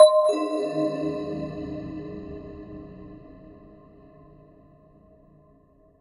SFX TouchToLight 1
Fresh SFX for game project.
Software: Reaktor.
Just download and use. It's absolutely free!
Best Wishes to all independent developers.
adventure, effect, electronic, fairy, fantasy, free-game-sfx, game, game-music, game-sound, magic, magical, magician, magic-touch, rpg, spell, witch, wizard